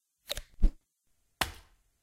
Crossbow Shot
A crossbow being shot and hitting it's mark.
bolt, crossbow, shot